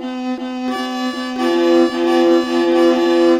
violin, two-string chords